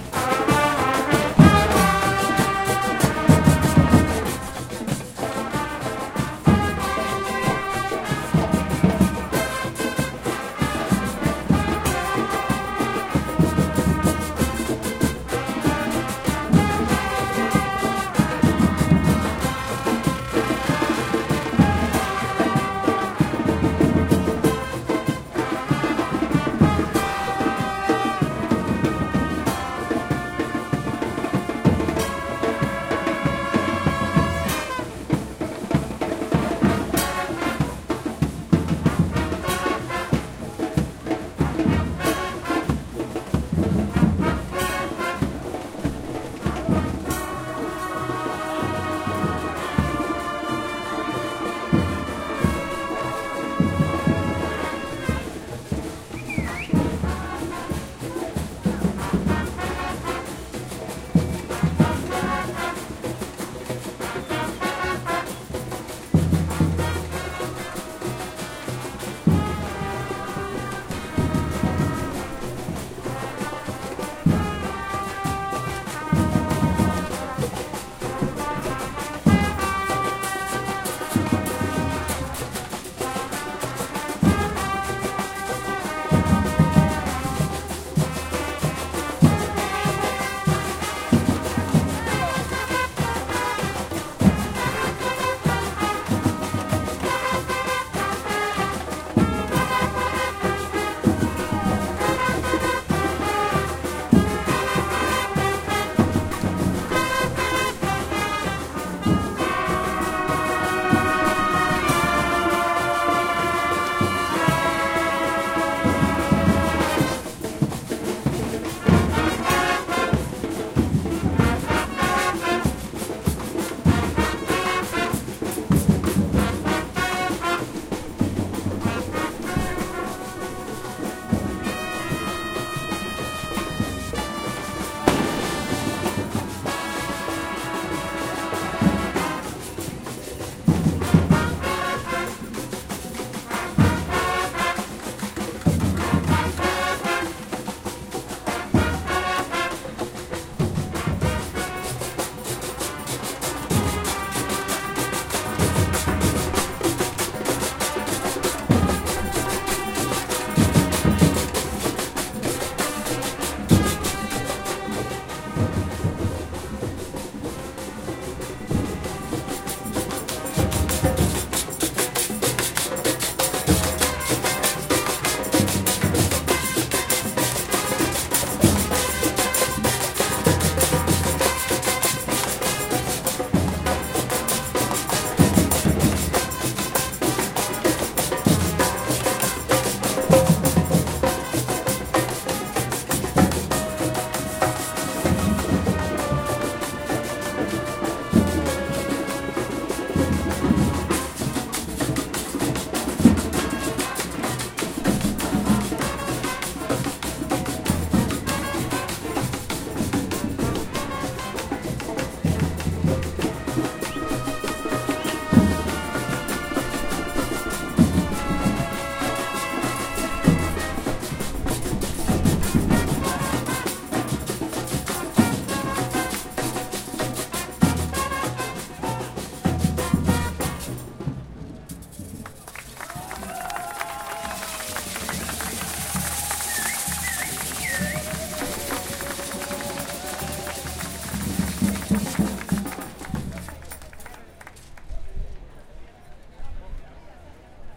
Ensamble de vientos y percusión tocando sobre la Av. Corrientes, marcha de la memoria, 40 aniversario del último golpe de Estado en la ARgentina. Nunca Más
Brass Ensemble playing at Av. Corrientes, Buenos Aires.
marcha, vientos, street, ensamble-metales, protest, metales, brass-ensemble